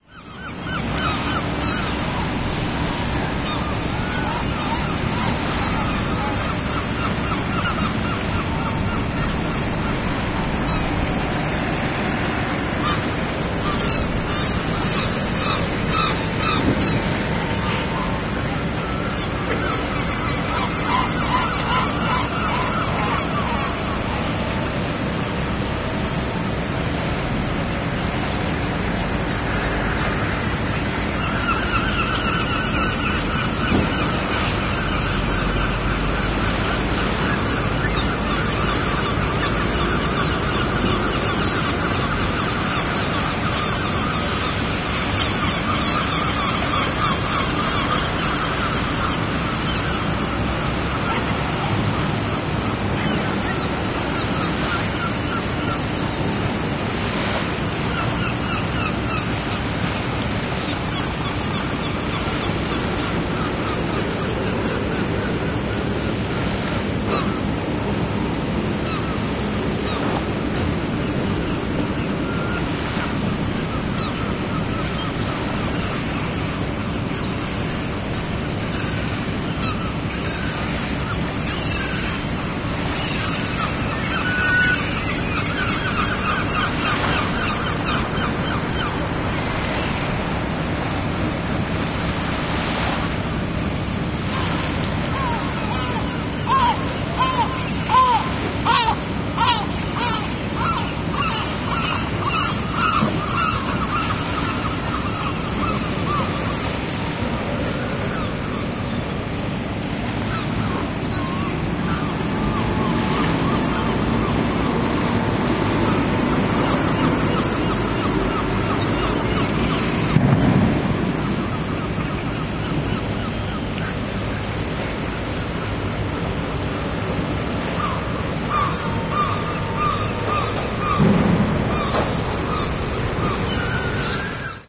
recorded with a olympus LS-11 in france at a harbor in the bretagne
harbor, sea, seagulls, seaside